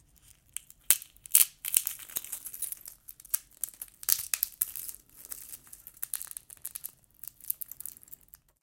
oeuf.ecrase 03
organic, biologic, crack, eggs, crackle